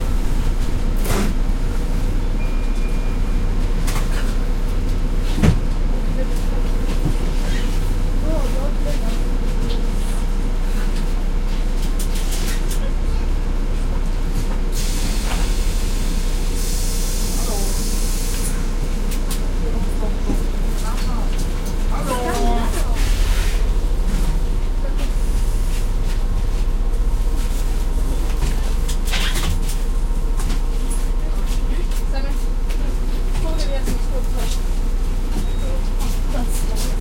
Bus, on the bus 1
On the local bus, waiting for it to drive away.
people, talking